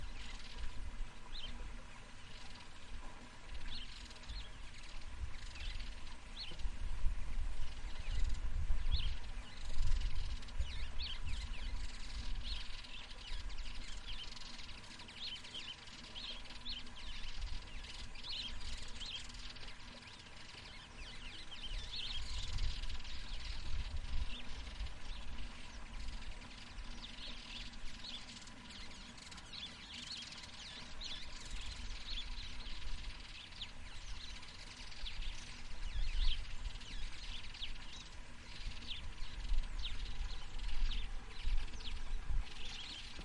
Dam Ambience
An Ambience at a dam with water running and birds chirping.
OWI, peaceful, Wildsound, chirping, ambience, tweeting, bird, chirp, Walla, Dam, singing, song, Birds, tweet